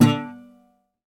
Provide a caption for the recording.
guitar-twang-muted-b
A guitar string pulled too far so it twangs against the frets. The string is slightly muted to get mostly just the effect and little of the tone of the string.